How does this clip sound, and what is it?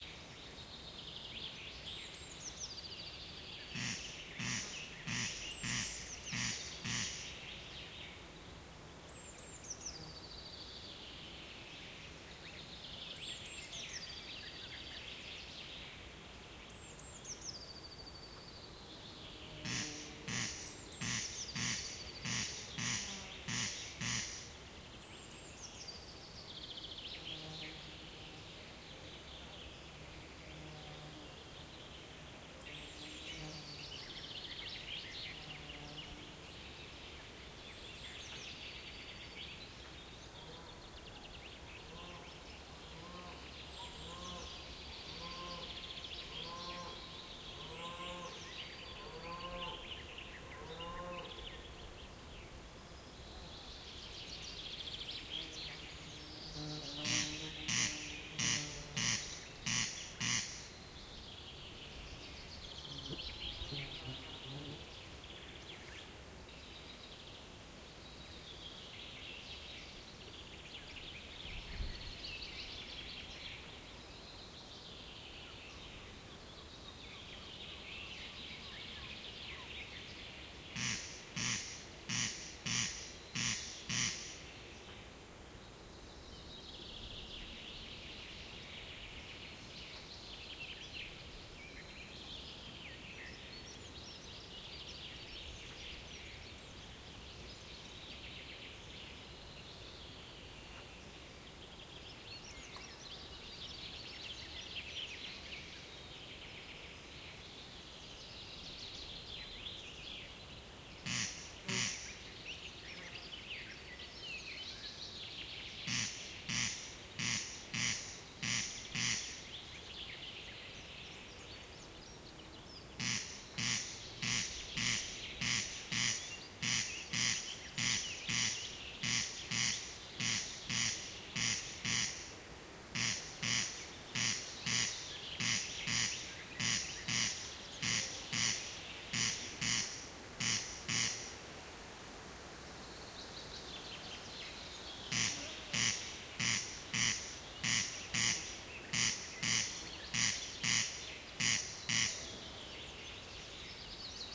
sound of ruisraakka
Corncrake, field-recording 29.06.2015 Savonlinna, Finland
Corncrake, birds, nature, field-recording